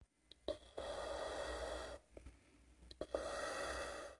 foley sound of a gas mask